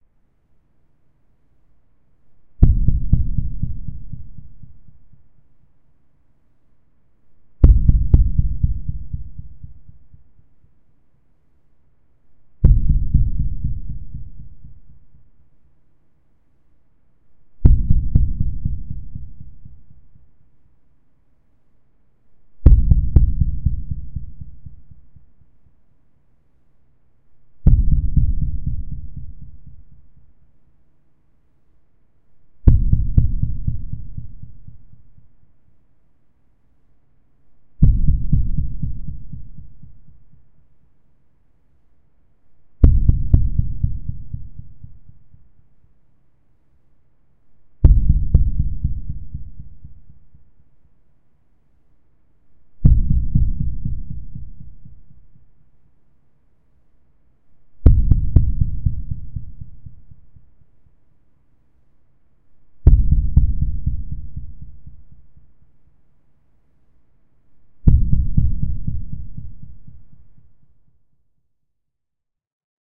Suspense High Tension
A percussive sound effect created using a Samson USB Studio microphone, an empty cardboard wrapping paper roll, and Mixcraft 5.